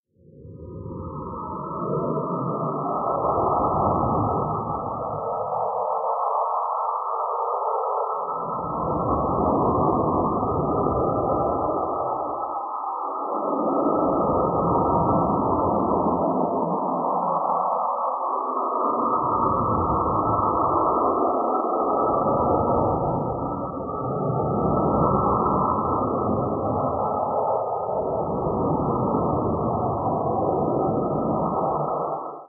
Huge Abstract Insects
Monstrous insects from another planet talking to each other. Scary! Sample generated via computer synthesis